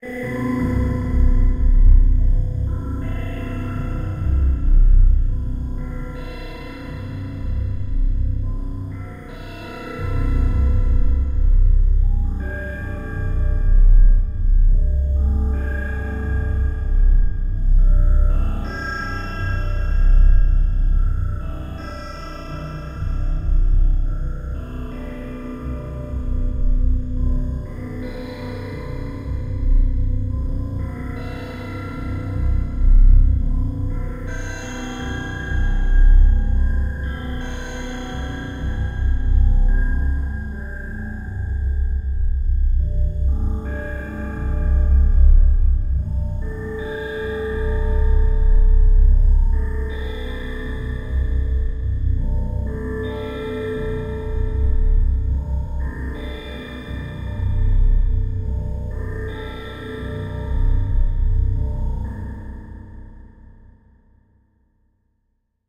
Ambient Space
It sounds like something that's going to happen in space.
Made with Grain Science app, edited with WavePad.
ambiance, ambience, thrill, ambient, atmosphere